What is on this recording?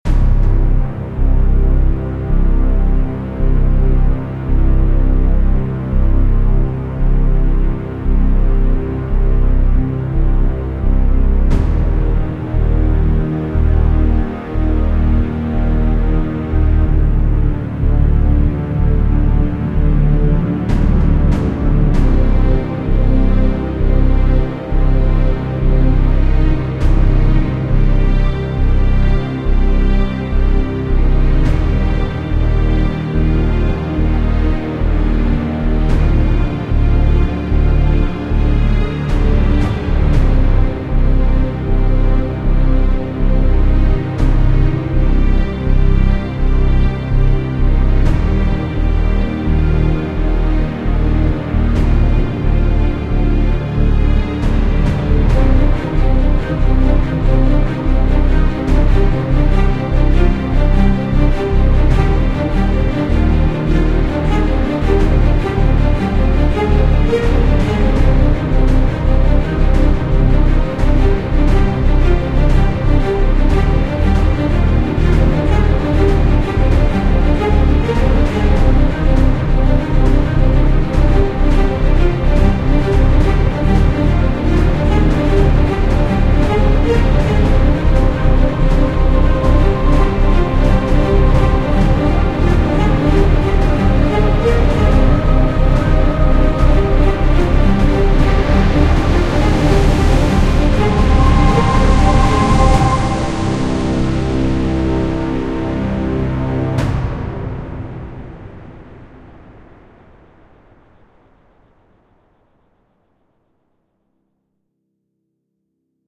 Cinematic Music-01
Music, FX, Game, Suspense, Film, Intro, Trailer, Cinematic, Action, Movie, Speed-up